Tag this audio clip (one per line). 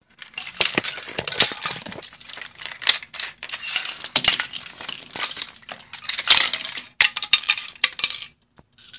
Creative
Edited